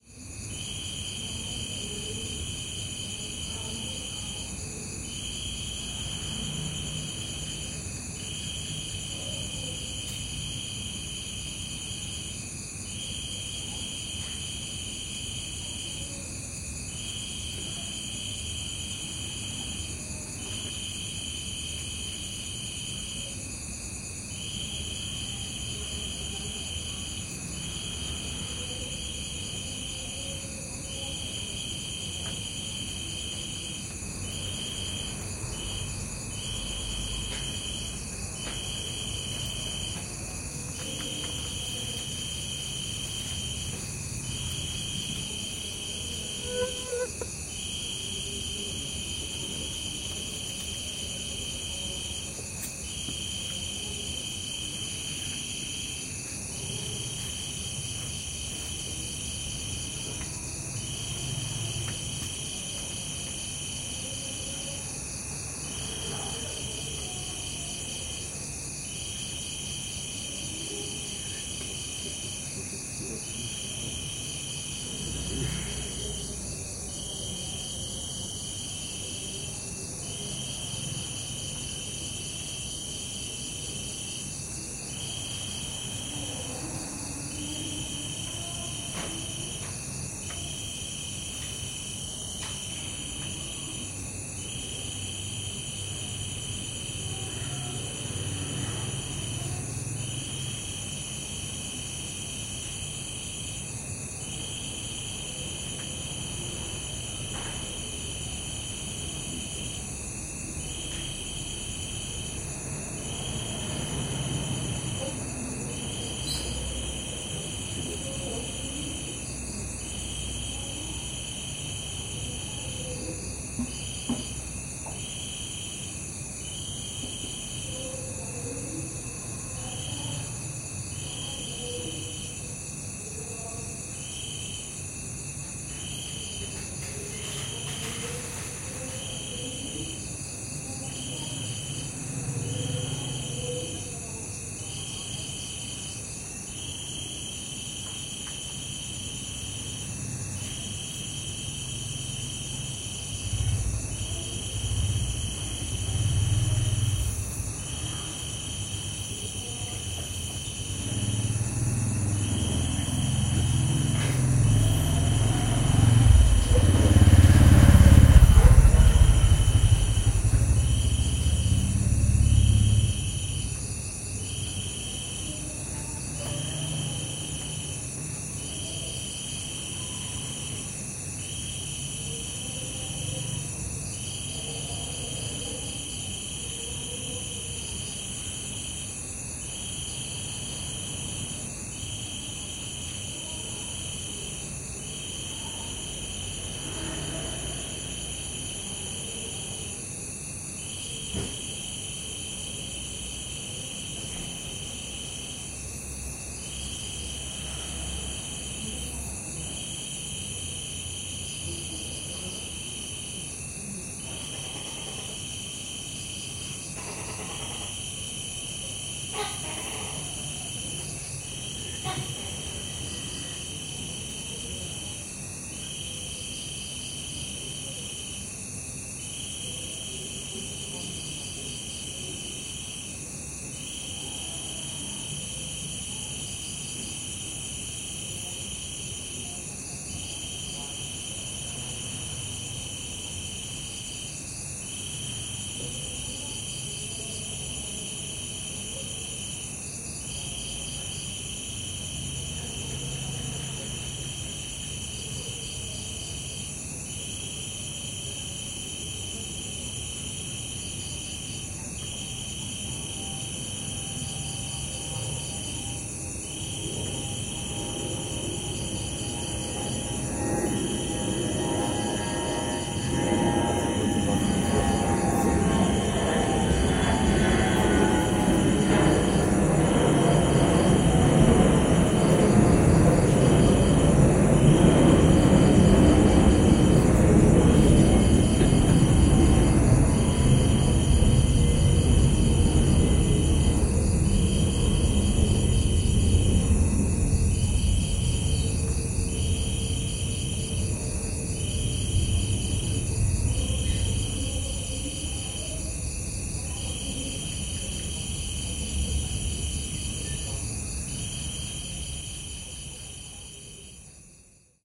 City atmo at night in Siem Reap / Cambodia,
Crickets, distant traffic, distant voices
Fly close to Mics: 47s
Motorbike passing: 2m48s
Goose (or Duck): 3m34s
Plane passing over: 4m25s
Date / Time: 2017, Jan. 02 / 23h27m